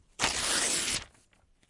Ripping a piece of paper. Recorded with a Zoom H5 and a XYH-5 stereo mic.